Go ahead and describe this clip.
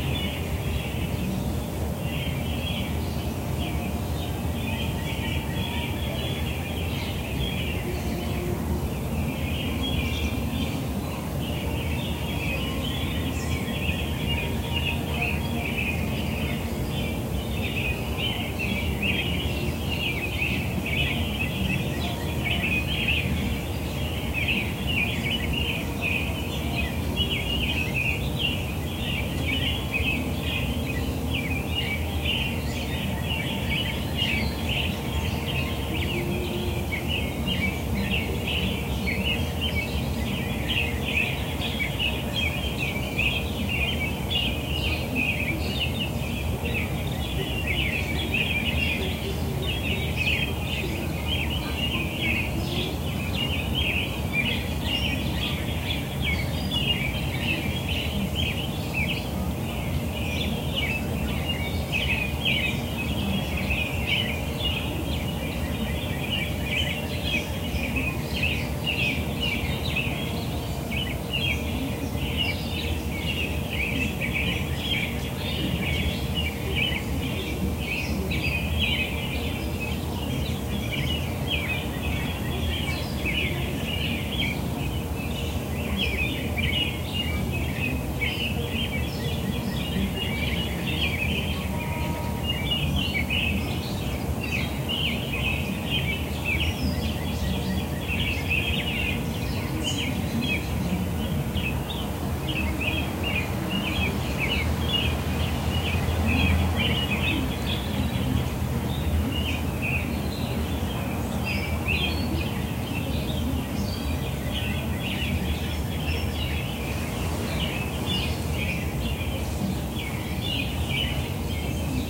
Early Morning Birds1 05-13-2016
Birds singing out my window in Atchison Kansas, mostly American robins and northern cardenals. You can hear the neighbor kid's TV on the other side of the wall in the background. Also the ever present low hum of traffic and trains in the distance. Recorded at about 05:30 this morning with Lifecam HD300 about 4 feet from the windows.
northern-cardenal, general-noise, birdsong, ambiance, American-robin, town, spring, field-recording, atchison, ambience, tv, bird, out-the-window, birds, backyard, nature, small-town, ambient